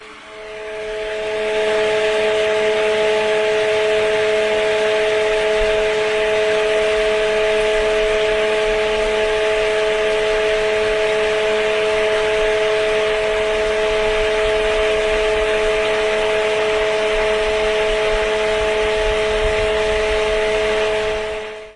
26.08.09: the fan from The Corner Pub on Taczaka street in the center of Poznan.